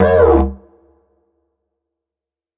Dino Call 5
short didgeridoo "shot" with some reverb added. enjoy.